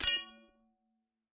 Common tumbler-style drinking glass being broken with a ball peen hammer. Close miked with Rode NT-5s in X-Y configuration.
glass, tumbler